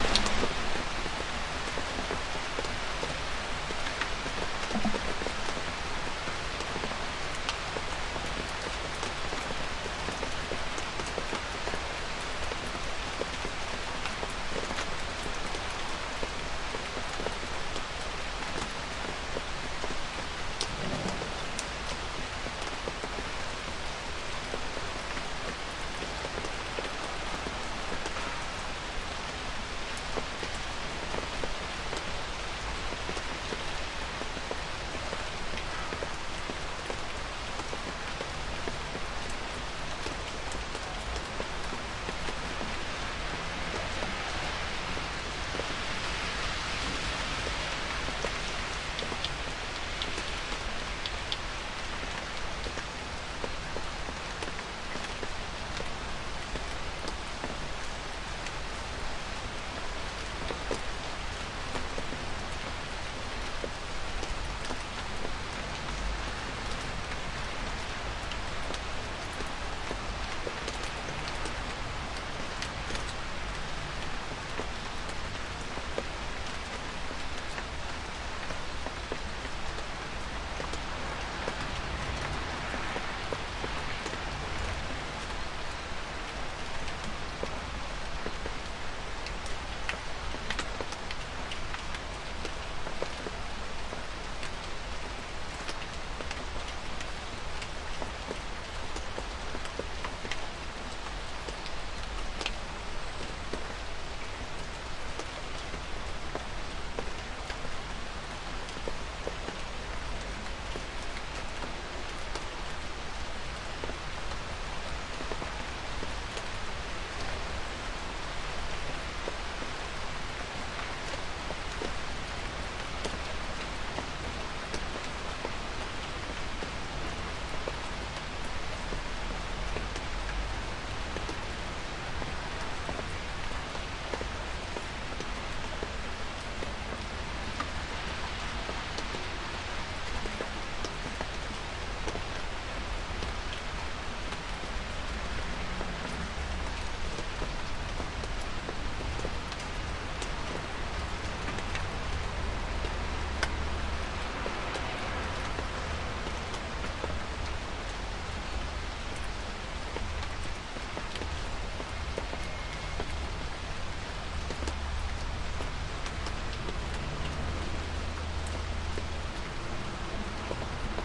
Rain from balcony dripping distant traffic 11102019
light rain recorded from a balcony
city, field-recording, urban, balcony, water, ambience, fall, traffic, dripping, autumn, rain